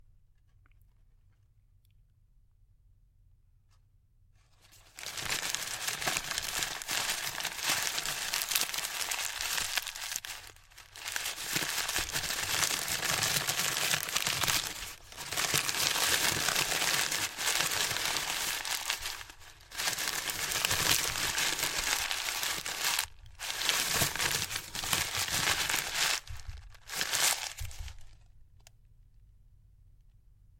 Crumpling of an empty pharmacy paper bag I made in an audio booth.
Recorded in Learning Audio Booth
It was recorded on a Yeti Mic
Picture was taken on my phone.